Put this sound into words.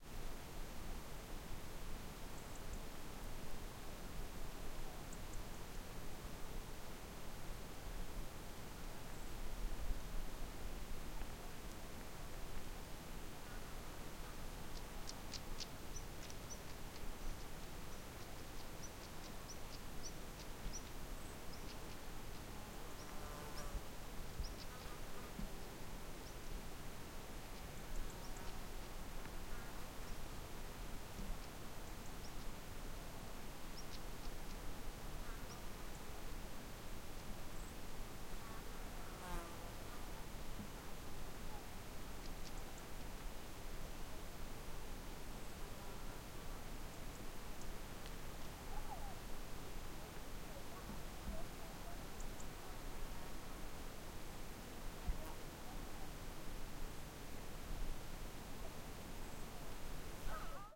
Ambi - Stone valley quiet, bird, wind - near Sea - Sony pcm d50 stereo Recording - 2010 08 Exmoor Forrest England
ambi, ambiance, birds, britain, england, exmoor, forrest, quiet, rock, sea, stereo, stone, stony, valley, wind